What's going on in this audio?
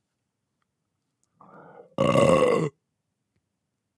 real life burp male human